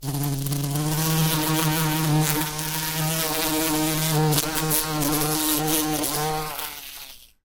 A large bumblebee recorded using a Sony PCM D50 with the built-in mics.
Bumble-bee, insect, Bee